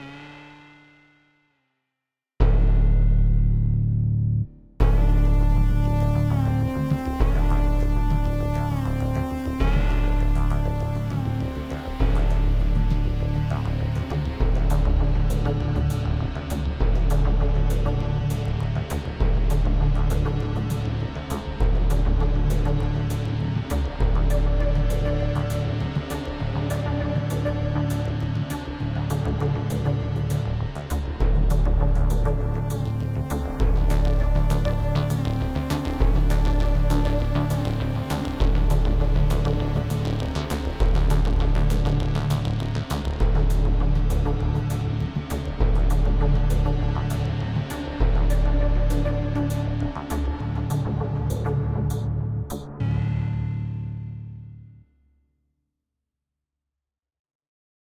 Toxic Leak
This intense intro will take you to a hazardous location, where sirens howl and danger lurks behind every corner.
aggressive, alert, ambient, cinematic, creepy, dark, dramatic, dynamic, film, horror, intense, intro, movie, powerful, rhythmic, scary, soundtrack, suspense, synth, terror, thrill